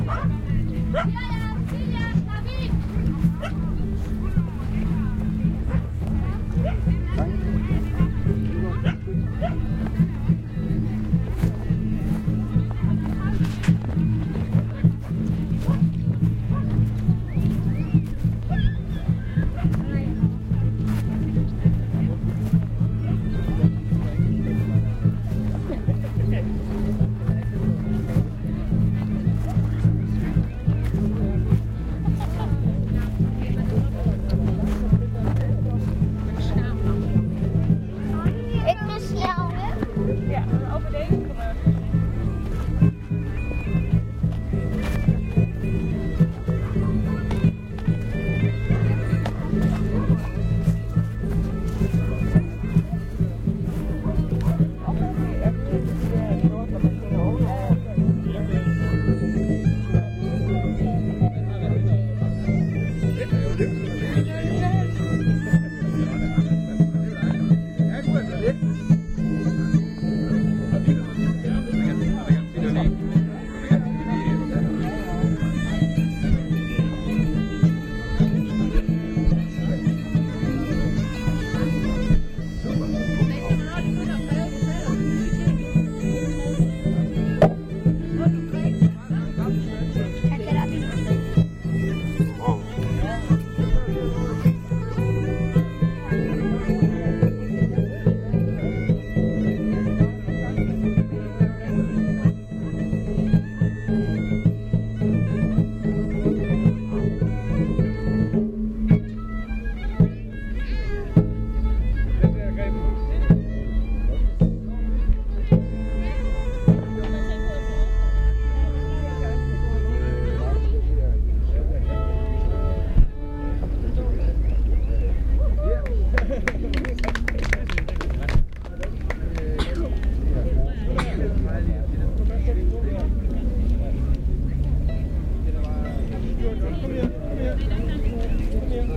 viking musicians
Went to a viking gathering in Bork, in southwest jutland. About four musicians walked around the market, while playing this very nice music. I stood about 10 m. away, in the back, so there's a little noise and chatter from bypassers and a little crowd just standing there listening.
Recorded with a Sony HI-MD walkman MZ-NH1 minidisc recorder and two WM-61A Panasonic microphones Edited in Audacity
bark, barking, crowd, dog, dogs, fieldrecording, midieval, music, musicians, outdoor, people, talk, viking, vikings